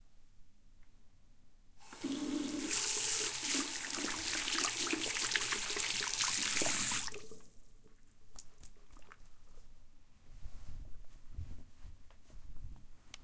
Water tap ON, washing hands, OFF
Water tap being turned on, Martina washes her hands, tap is being turned off, Martina shakes hear hands to dry them.
Part of Martina's Evening Routine pack.
Recorded with TASCAM DR-05
Signed 16 bit PCM
2 channels
You're welcome.
wash, high-quality